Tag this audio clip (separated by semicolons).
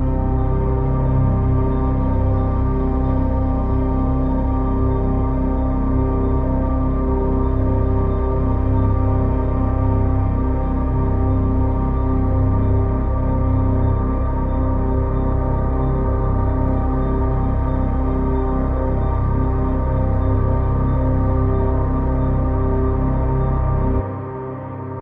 ambient; artificial; drone; experimental; soundscape; space